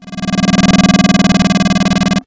Sound effect made with VOPM. Suggested use - Warp in
FM-synthesis, game, sound-effect, video-game, VOPM